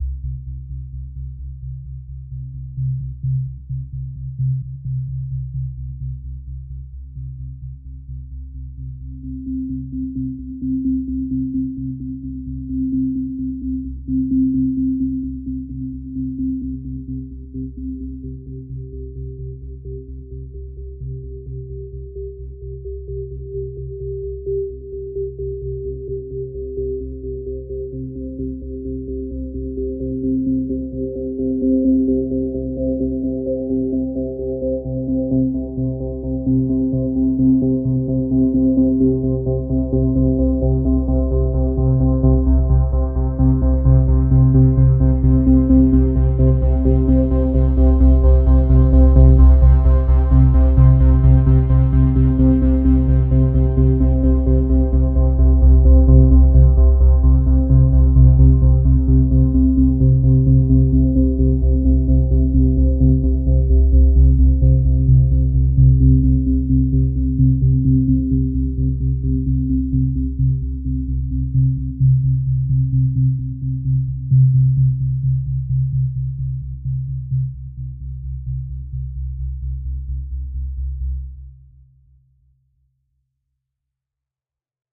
Pulsing Drone Ambience
thrill, suspense, terror, ambient, spooky, pulsing, haunted, drone, scary, horror, ambience, creepy, terrifying, sinister, pulse, atmosphere